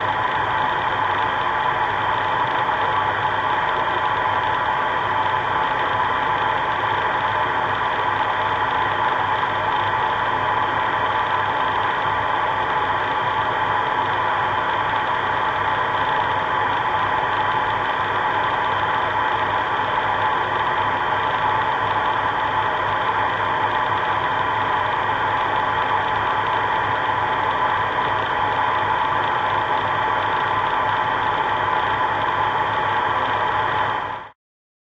Contact mic recording